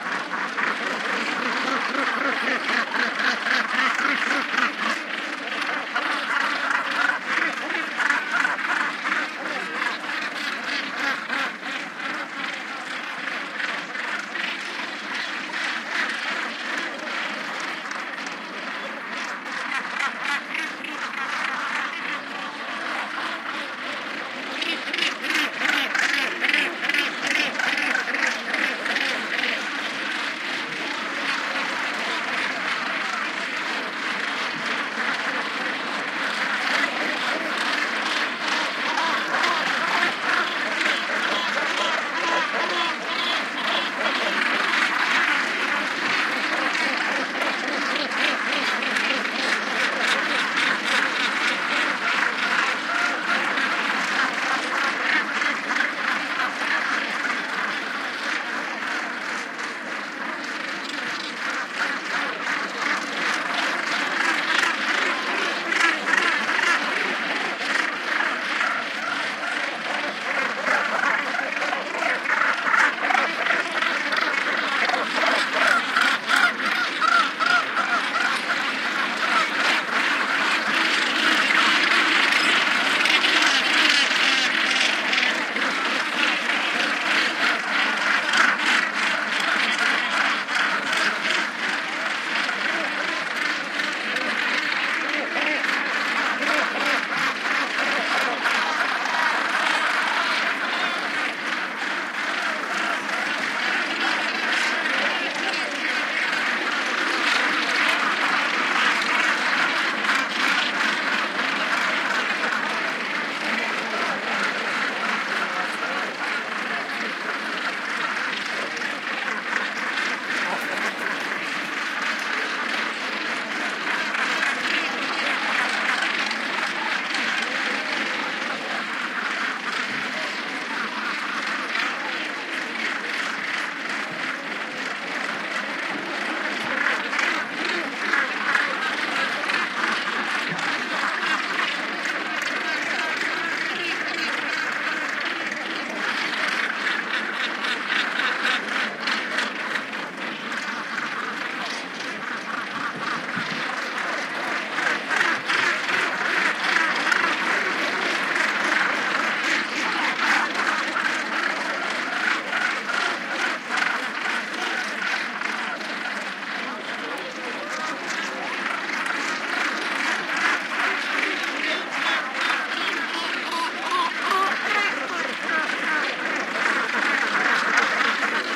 20080810.gannet.colony
This is the general ambience at the famous Gannet breeding colony at Bonaventure Island, Gaspé Peninsula, Quebec. Recorded using two Shure WL183 capsules, Fel preamplifier, and Edirol R09 recorder.
lpel, sula, fou-de-bassan, field-recording, seabirds, colony, nature, jan-van-gent, birds, basstolpel, alcatraz, gannet, morus-bassanus